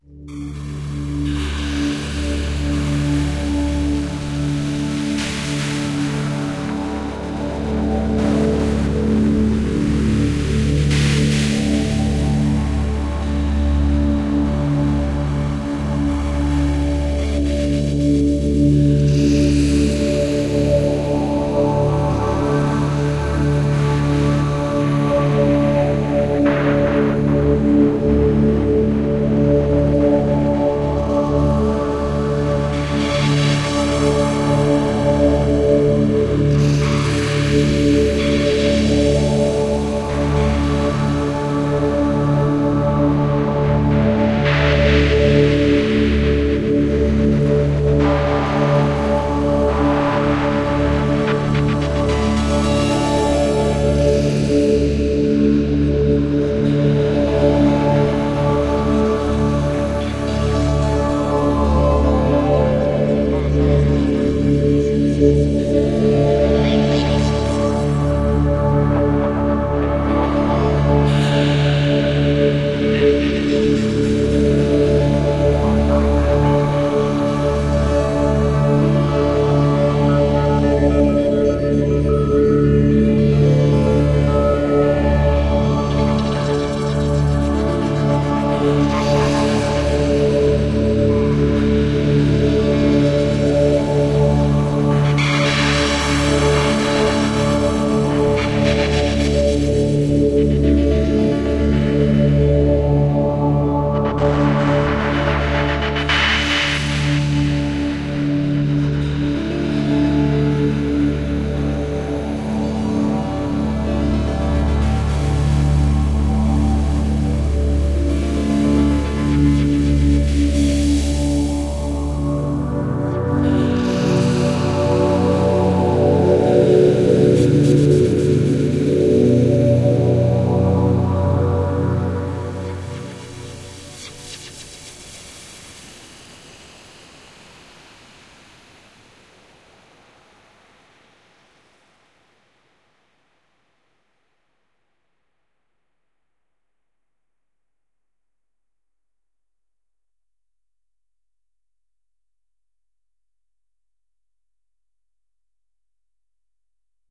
Arcane temple
hz, ambiance, minor, temple, cosmos, many, atmos, voices, D, noize, 432, glitch, ambience, soundscape, space